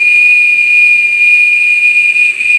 Nerf Whistle loop
The sound of one of the whistles on a foam dart toy.
created by blowing on the whistle then making a loop in Audacity.
toy, loop